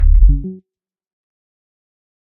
UI Correct button11
game button ui menu click option select switch interface
button, click, game, gui, interface, menu, option, scroll, select, switch, ui